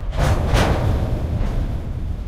rasp train 004
the rattle of a freight train.